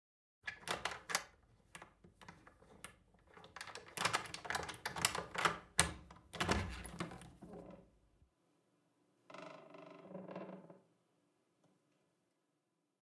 Wooden door unlocking with a key
A wooden door being slowly unlocked with a key.
door, door-key, field-recording, foley, key, keys, open, open-door, unlock, wood, wooden-door